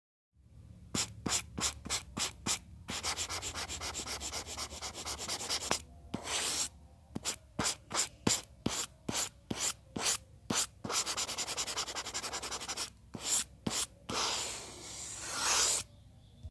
wacom pen 001
digital drawing using wacom pen
arts, draw, drawing